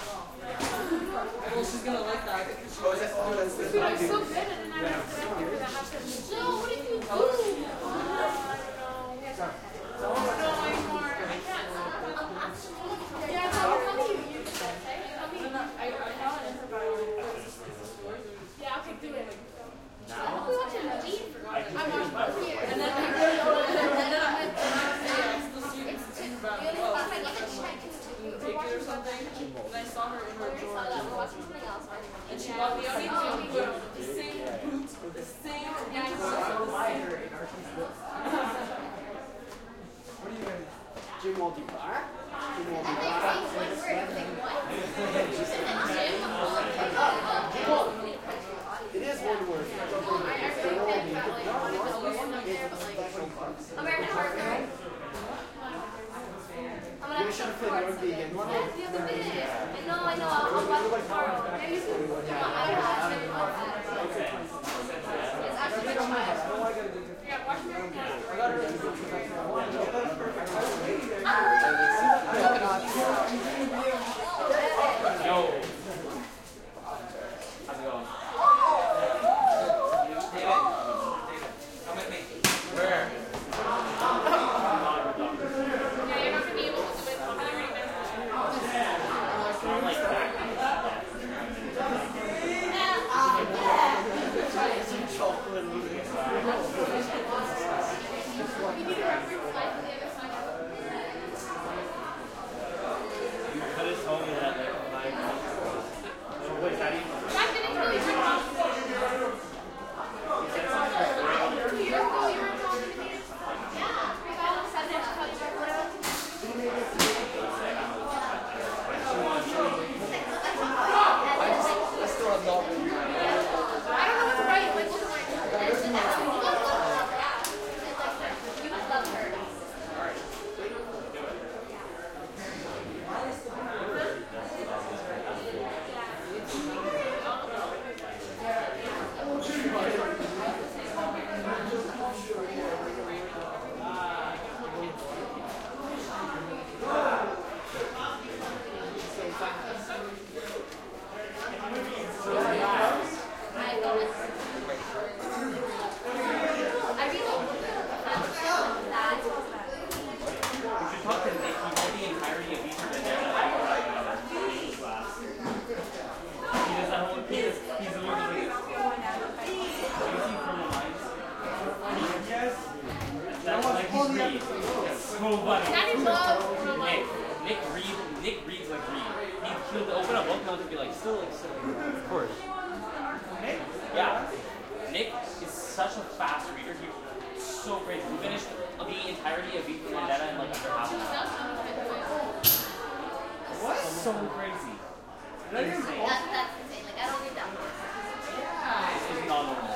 crowd int high school hallway tight little boomy crowd light active conversations and lockers end